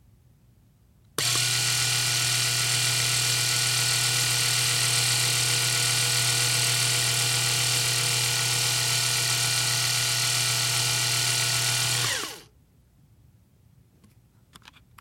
machine sound 2 (electric razors) 04
A recording of an electric razor my friend and I made for an audio post project
electric-razor, mechanical, machine